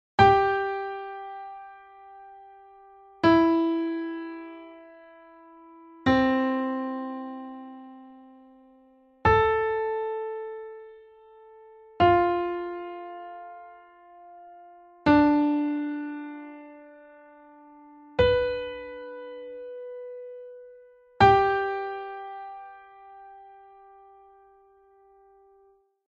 G Major MixoLydian